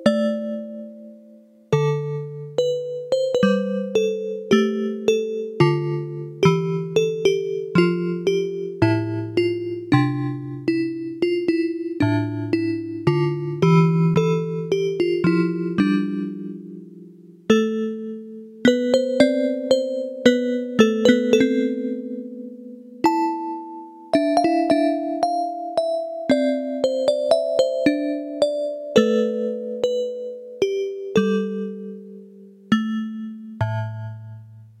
Yamaha PSS-370 - Sounds Row 4 - 16
Recordings of a Yamaha PSS-370 keyboard with built-in FM-synthesizer
Yamaha, FM-synthesizer, Keyboard